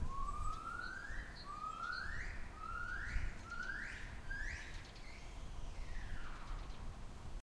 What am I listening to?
You hear a Gibbon monkey.